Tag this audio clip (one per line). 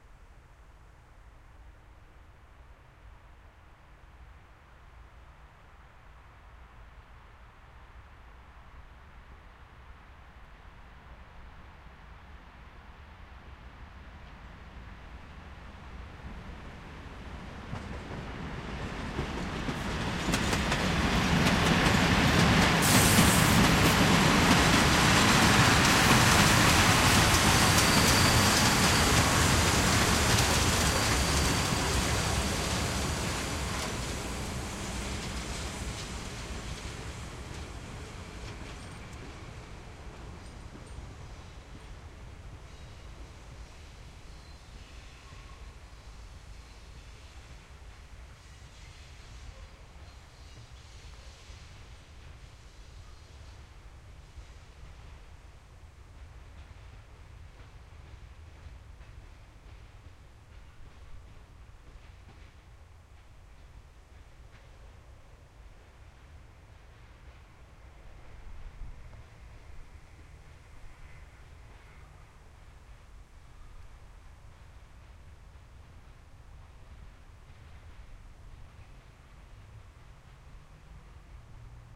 ambience
railwaystation
train
transport